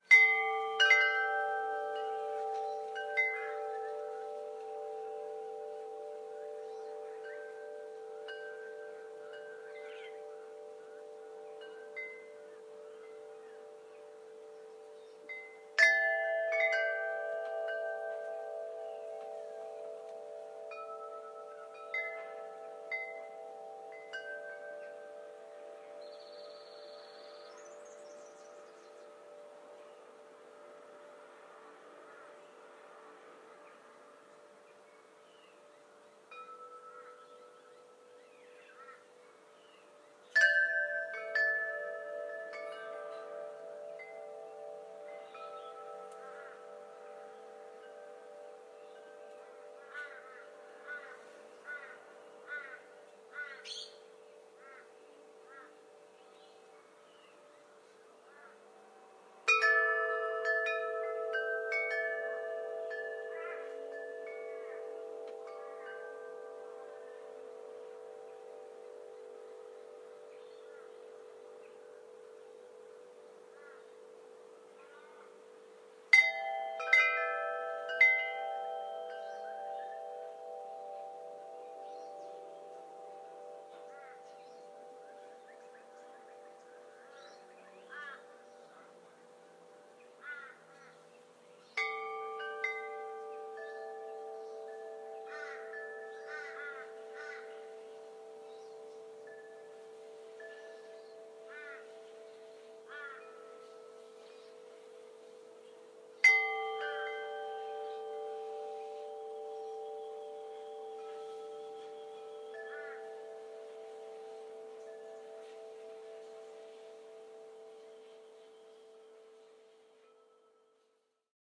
Recording of wind chimes with iPhone. Also captured birds and surrounding sounds. Enjoy.